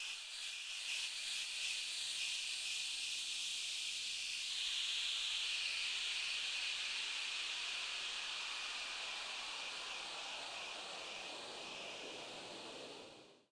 Retro Random Sound 03
This sound is a slowed down version of other soundeffect retro_sasuke_chidori.
Thank you for the effort.